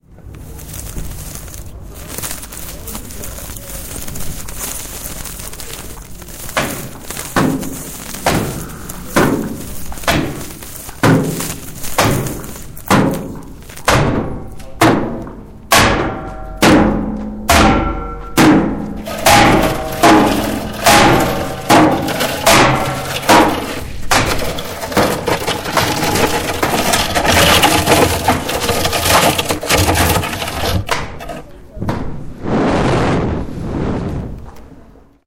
delphine,neel&tom
This is a result of a workshop we did in which we asked students to provide a self-made soundtrack to a picture of an "objet trouvé".
bruitage, field-recording, workshop